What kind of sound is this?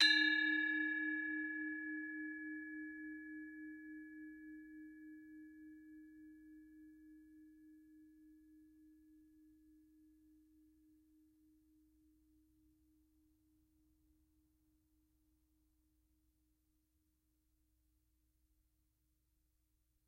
University of North Texas Gamelan Bwana Kumala Pemadé recording 2. Recorded in 2006.